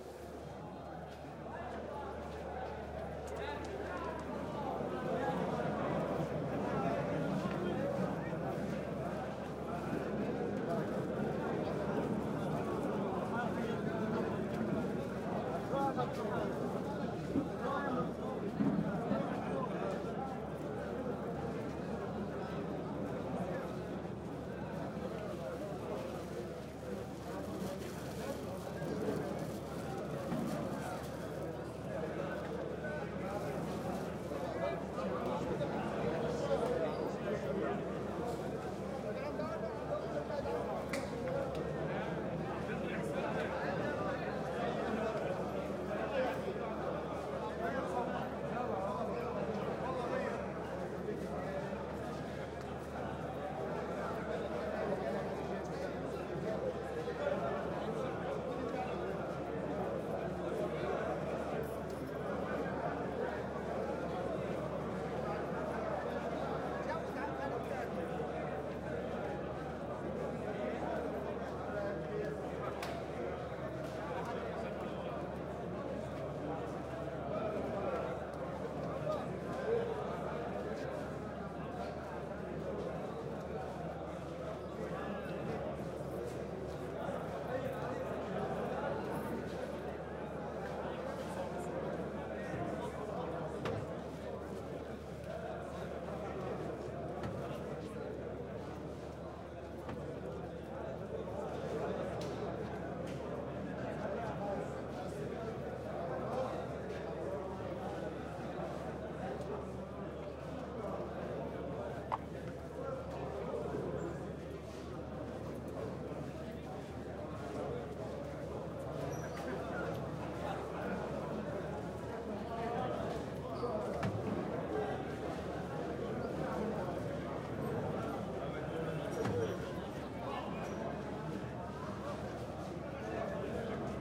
Indoor Crowd talking arabic chatting
Recording of a gathering of men before a marriage ceremony inside a Palestinian camp in tyre-lebanon
Rode NT4 into an SD 633
arabic, beirut, chat, chatting, crowd, gathering, Indoor, lebanon, men, palestine, people, talk